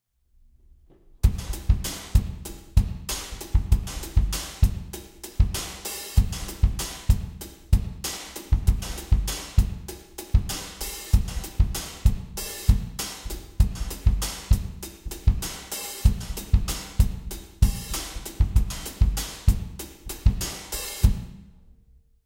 mLoops #11 97 BPM
A bunch of drum loops mixed with compression and EQ. Good for Hip-Hop.
150, Acoustic, BPM, Beats, Compressed, Drum, EQ, Electronic, Hip, Hop, Loop, Snickerdoodle, mLoops